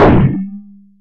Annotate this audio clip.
Techno/industrial drum sample, created with psindustrializer (physical modeling drum synth) in 2003.
industrial, synthetic, percussion, drum, metal